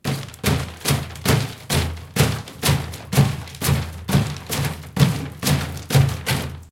Plastic Drum Thuds Various 2
Bang, Boom, Crash, Friction, Hit, Impact, Metal, Plastic, Smash, Steel, Tool, Tools